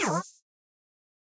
Cute abstract sound, I used this to represent an item being taken away from you in a game.